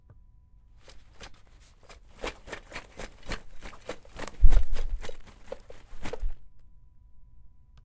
jangling a bag jingle bells yes